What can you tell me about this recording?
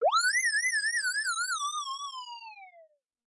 A cartoony fall from a great height.
cartoon, cartoon-sound, comedic, comic, comical, digital, electronic, funny, fx, humorous, sfx, silly, sound-effect, sound-effects, synth, synthesized, synthesizer